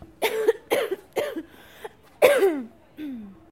paisaje-sonoro-uem toses2
Paisaje sonoro del Campus de la Universidad Europea de Madrid.
European University of Madrid campus soundscape.
Sound of cough
soinido de tos